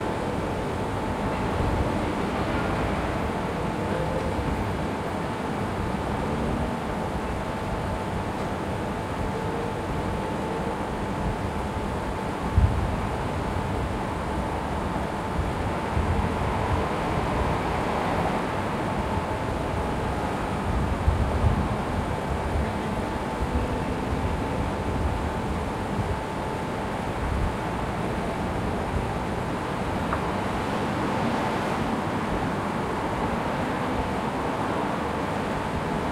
air conditioning 8
Hum of air conditioning. This is tube from window near ground.
Recorded 2012-10-13.
noise, conditioning, street, hum, Russia, air, city, tube, town, Omsk, air-conditioning